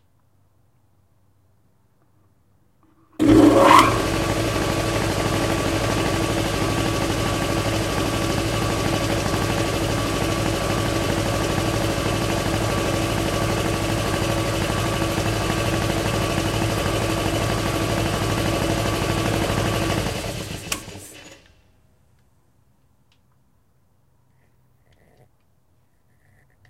compressor de ar liga e desliga
de, motor, ar, compressor, mquina